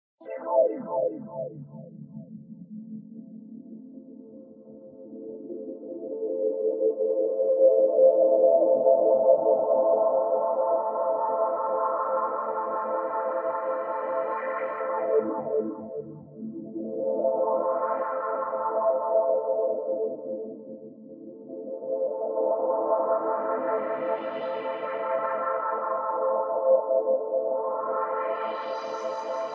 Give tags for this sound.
morphing
ambience
130
atmosphere
reverb
lushes
progressive
bpm
pad
soundscape

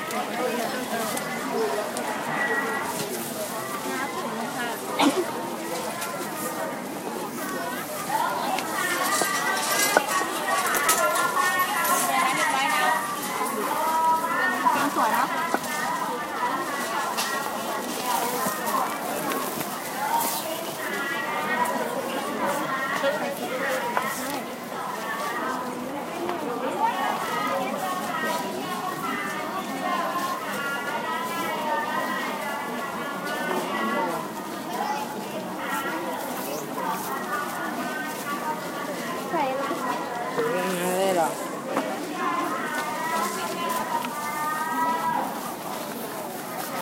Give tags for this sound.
Asia
Bangkok
busy
china
City
field
market
place
recording
street
Thailand
town

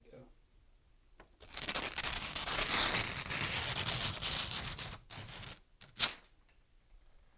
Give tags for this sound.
paper
notebook
tear
ripped
ripping

